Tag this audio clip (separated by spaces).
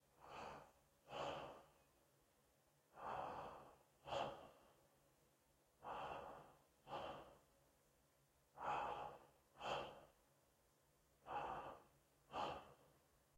breath breathe breathing human male scared slow voice